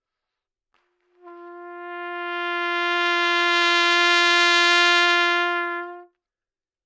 Part of the Good-sounds dataset of monophonic instrumental sounds.
instrument::trumpet
note::F
octave::4
midi note::53
good-sounds-id::2921
Intentionally played as an example of bad-dynamics-errors